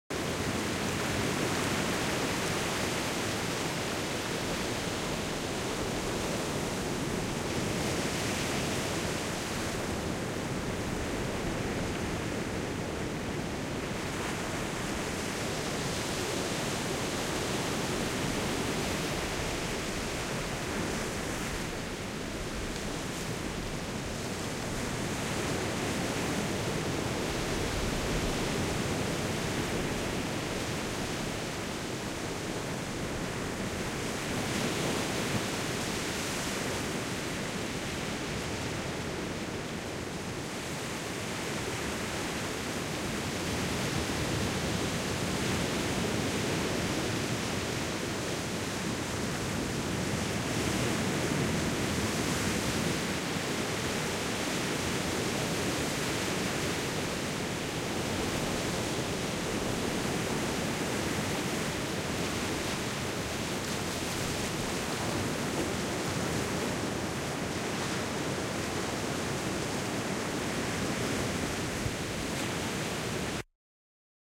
Constant low rolling surf coming onto the a shallow sloping sandy beach, recorded from about 5 meters from the water.